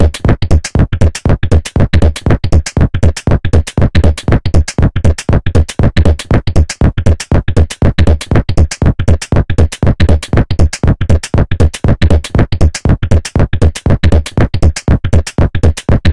Drum Loop 2 - 119 Bpm
bpm, drum, 119, 2, loop